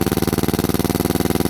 Pneumatic angle grinder - Fuji f7vh - Run 1a short
Fuji f7vh pneumatic angle grinder running freely.
2beat, 80bpm, air-pressure, angle-grinder, crafts, fuji, labor, metalwork, motor, one-shot, pneumatic, pneumatic-tools, tools, work